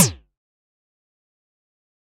Lazor-Short-Low2
A cheesy laser gun sound. Generated using Ableton Live's Operator using a pitch envelope and a variety of filtering and LFOs.
sci-fi, laser, lazer, weapon, shoot, zap